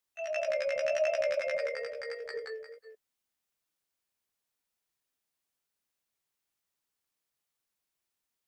A marimba with multiple FX applied to it